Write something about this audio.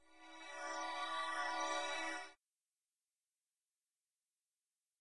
A short pad-like (?) sound.
synth, pad, cosmic